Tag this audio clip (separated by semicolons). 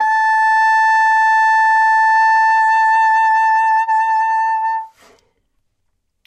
alto-sax; jazz; sampled-instruments; sax; saxophone; vst; woodwind